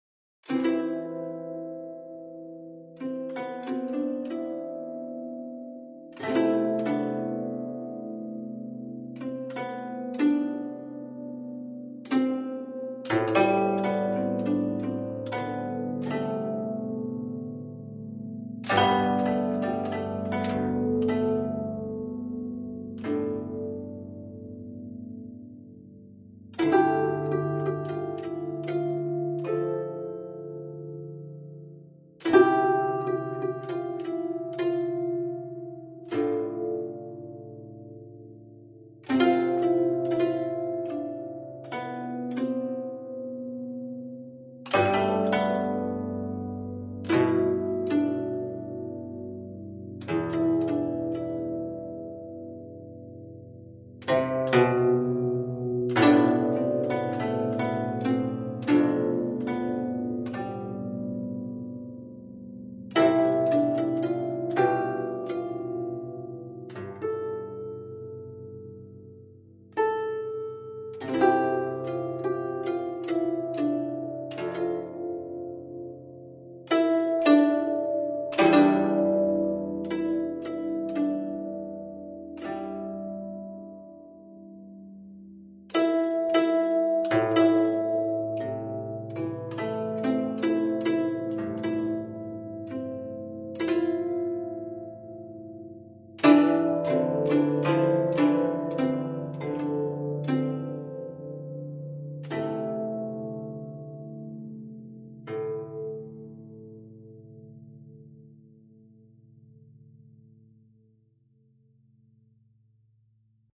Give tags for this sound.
soundtracks
movie

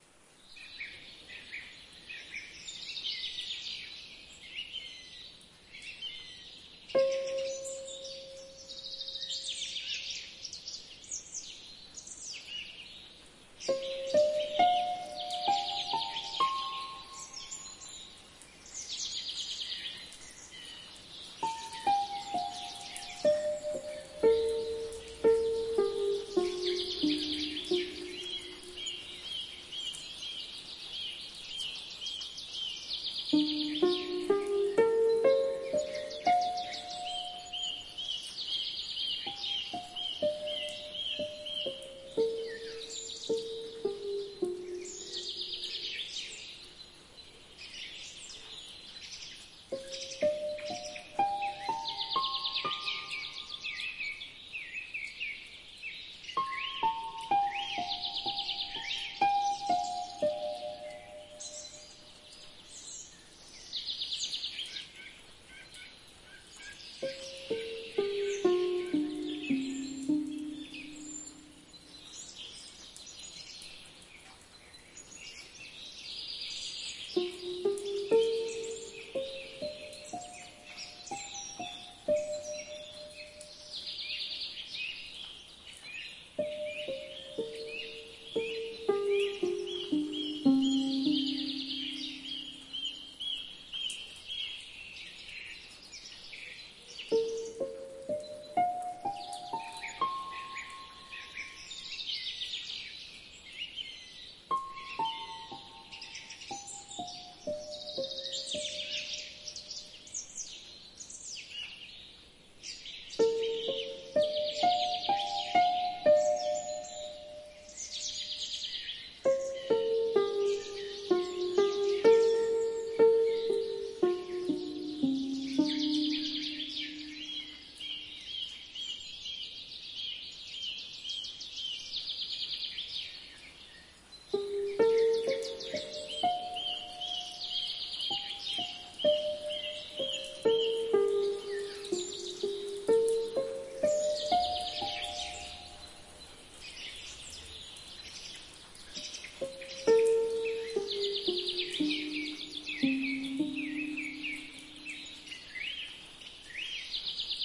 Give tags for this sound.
birds
chinese
relaxation
ambient
forest
rain
nature
harp
calm

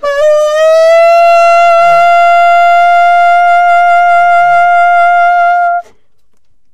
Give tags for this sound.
sax
vst
saxophone
jazz
tenor-sax
sampled-instruments
woodwind

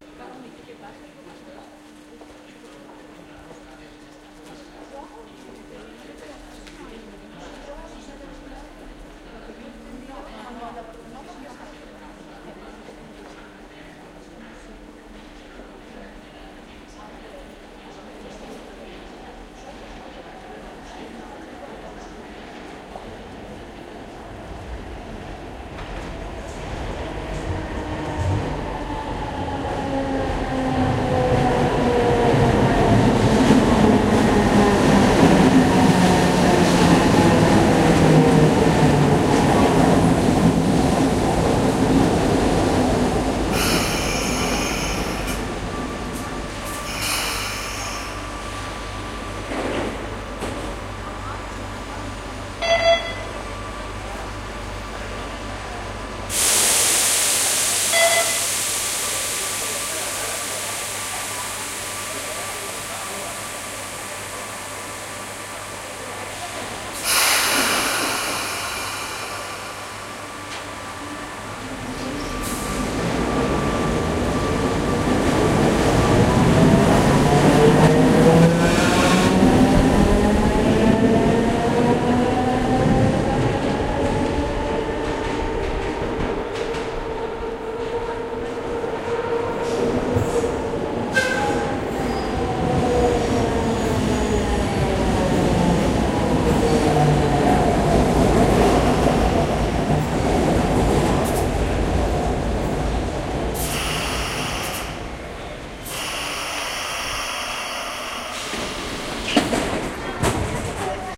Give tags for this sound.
barcelona
field-recording
industrial
metro
subway

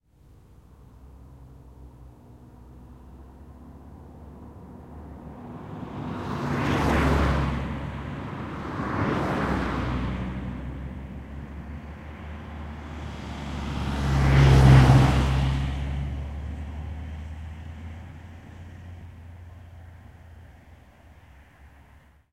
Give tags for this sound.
cars
field-recording
stereo
xy

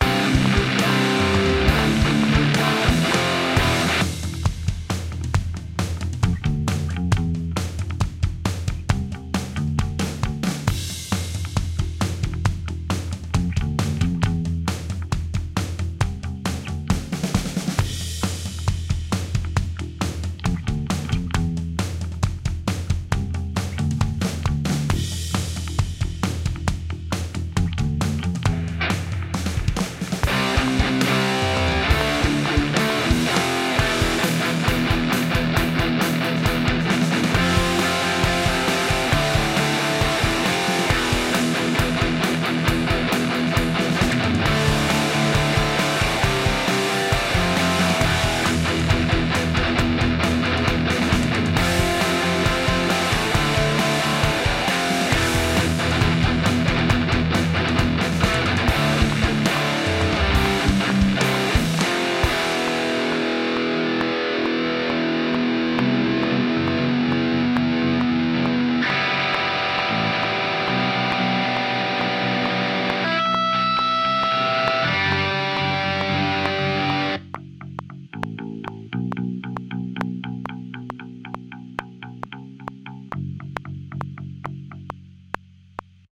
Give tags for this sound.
90s
drums
edge
el
guitar
hardcore
intro
punk
riesgo
rock
straight
sxe
toma

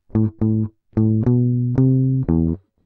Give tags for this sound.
jazz
jazzy
apstract
licks
groovie
lines
funk
pattern
guitar
fusion
acid

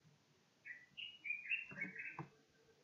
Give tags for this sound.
bird
tweet
chirp
call

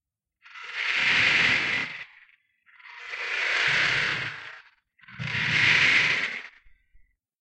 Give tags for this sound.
human
pull
rake

